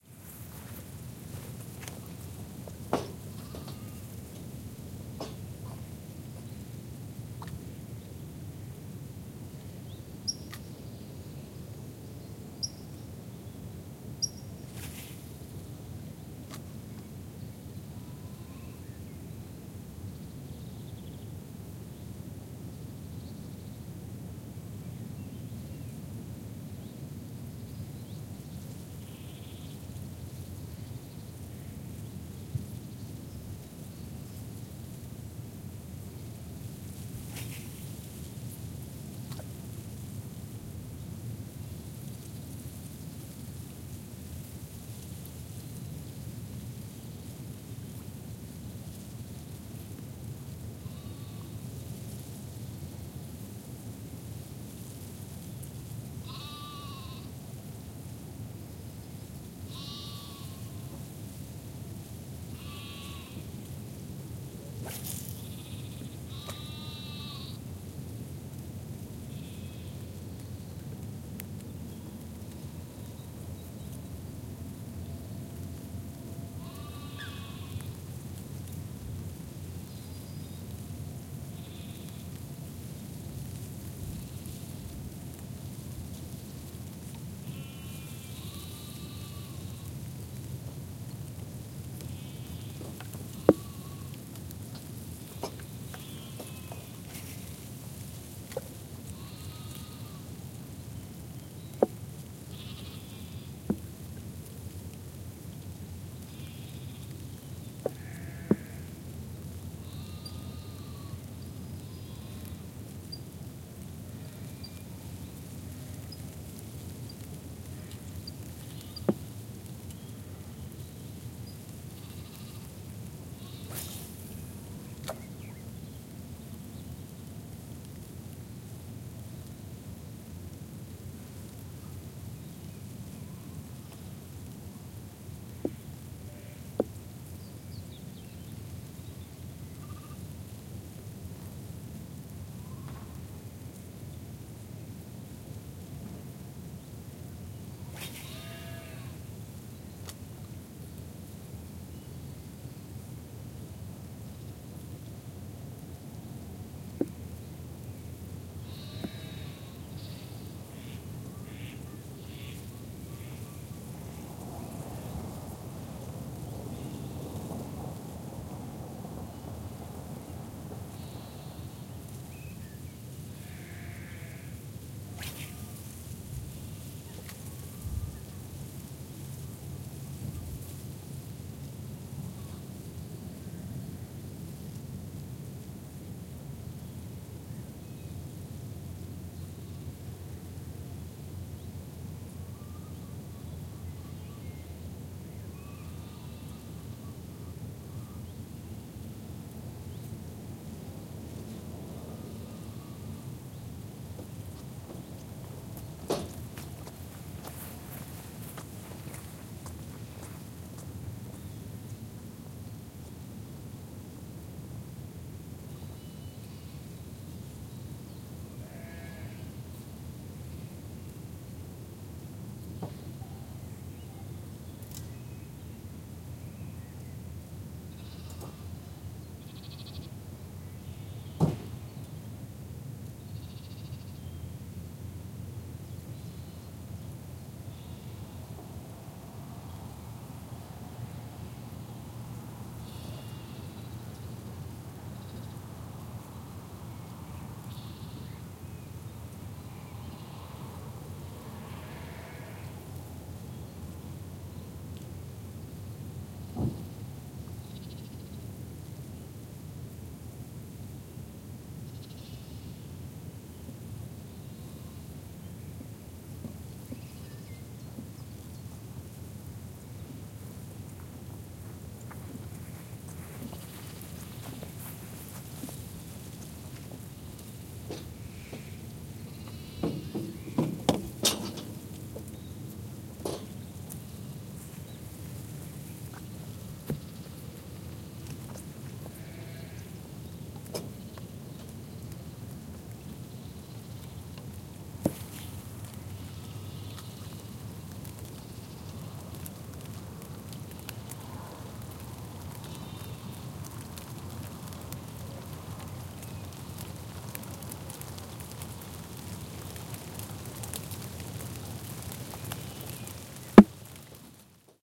Fishing & Nature - The Netherlands
Recording of me fishing in The Netherlands with rain and nature in the backgroud aswell!
(The ticks you hear sometimes is the rain falling on my ZOOM)
Enjoy!
ambiance, ambience, ambient, atmo, atmos, atmosphere, atmospheric, background, background-sound, general-noise, Holland, nature, recording, soundscape, white-noise